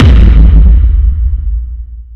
Climactic Boom
Created from some mash up and distortion of a bass drum sound. Good for climactic scenes in movies or songs.